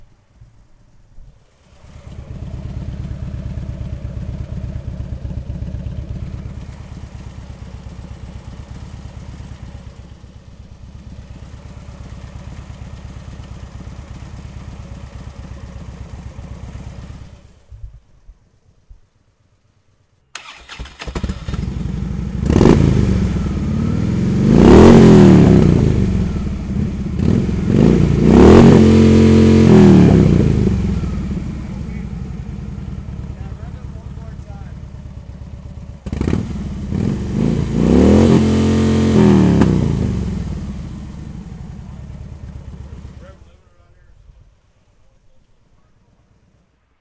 Field recording of a Harley-Davidson motorcycle starting, idling and revving; recorded at Scuttlebutt's biker bar in Greenfield, Wisconsin, USA, using an MXL 990 on a boom.